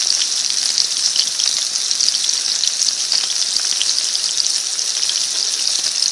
Sizzling meat 1
meat cooking on a griddle
cooking, frying, sizzle, sizzling